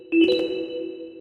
effect notify
simple synth effect for my game.
synth, pickup, item, levelup